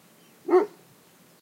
Dog barking, 15m distance
One bark of a dog in about 15m distance.
Recorded with two Rode M2.
barking, dog, dogs, woof, bark